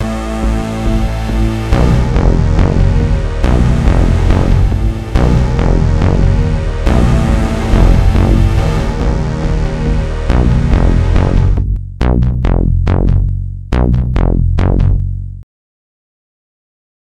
🧟 Tension music for a indie videogame 🀟
16-bit, 8-bit, bso, free, game, indie, loop, melody, music, sounds, synth, tension, thriller, videogame